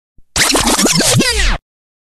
record rewind
DJ tool to use as a winding back a record